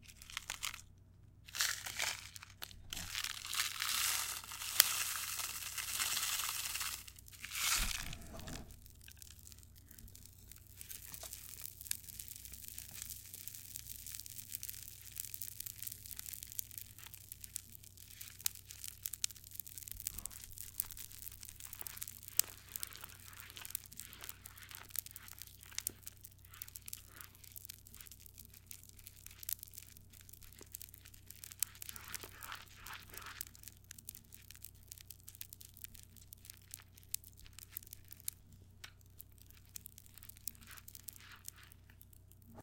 Pop cream
I filled bowl with shaving cream and pop-rocks.
The file includes the sound of me pouring the pop-rocks on the bowl of foam and stirring a few times with a paint brush.
close-up, shaving-cream, mixing, foam, popping, pop-rocks